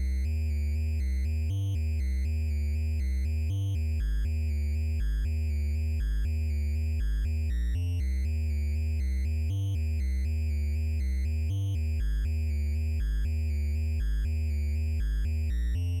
Retro Music 01d
Made with beepbox!
120-bpm, Retro, synth